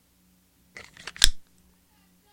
Magazine Insert
Inserting the magazine of a Smith and Wesson 9MM.
pistol, magazine, gun, Smith-Wesson